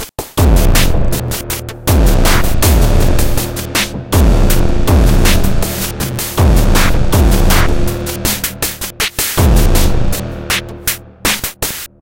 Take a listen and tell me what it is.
Noisy analog drum loop made with a distorded kick/snare, the stab is made using a Minimoog with soft reverb